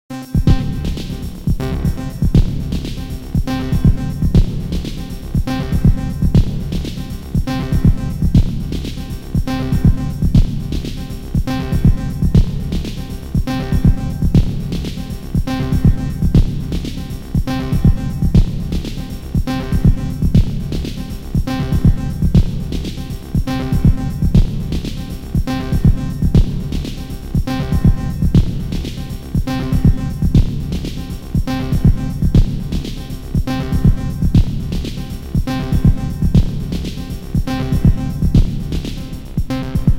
cubix beat
Easy beat done with Cubix VST
Loop, CubixVST, Beat, Groove